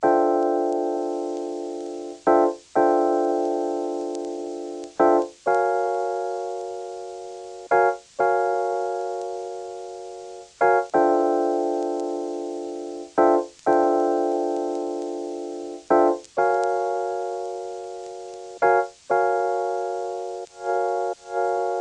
Dusty Lofi Piano Loop 88 BPM
88, bpm, chill, Dusty, hiphop, jazz, lo-fi, lofi, loop, loops, melody, music, nostalgic, pack, packs, piano, pianos, relaxing, sample, samples, sound, vinyl